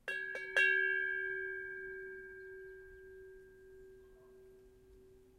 ships-bell
I recorded the sound of this ship's bell at friends' homes.
shipsbell, ding, naval, maritime, field-recording, sailing, nautical, bell